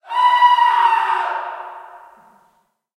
Scream1 Short
Out of the series of some weird screams made in the basement of the Utrecht School of The Arts, Hilversum, Netherlands. Made with Rode NT4 Stereo Mic + Zoom H4.
Vocal performance by Meskazy
horror, pain, fear, painfull, death, disturbing, funny, screaming, yelling, anger